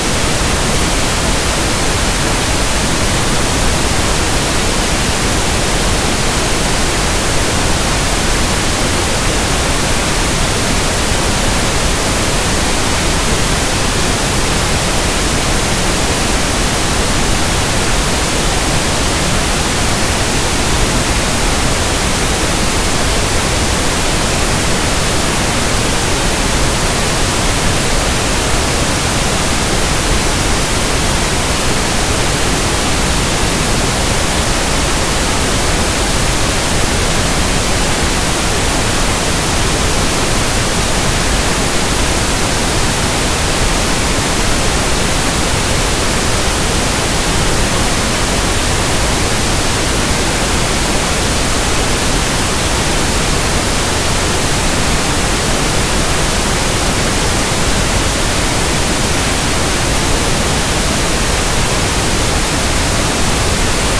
NOISE-PINK-10VU
10dBVUfs, Noise, Pink